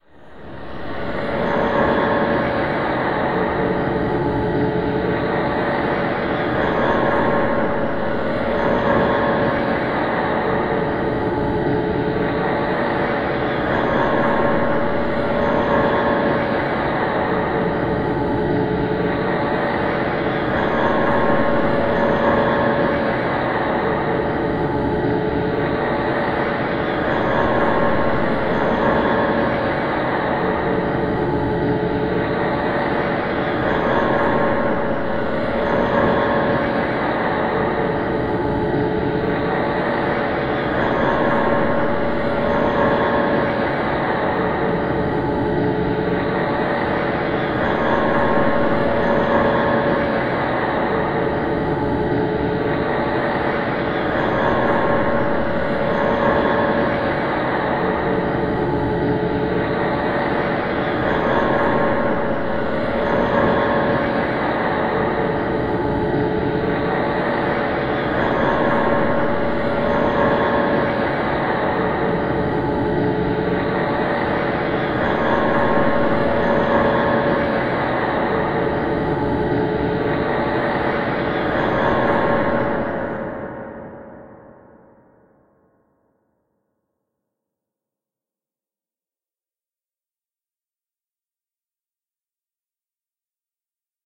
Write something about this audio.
Nightmare realm
Very basic, airy ambiance for nightmare sequences, visions, and the like. Created by processing some wordless vocalizing through Audacity in various ways.
ambiance, ambience, anxious, atmos, atmosphere, background, background-sound, basic, creepy, dream, haunted, nightmare, otherworldly, sinister, space, spooky, vision